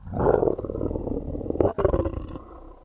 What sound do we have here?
A big-cat-sounding growl; on the exhale and inhale.
This sound was created using my voice, a PC mic, and a pitch-shifter. Not sure what it's suitable for, but friends keep asking me for it (I use it as my empty-the-recycle-bin noise).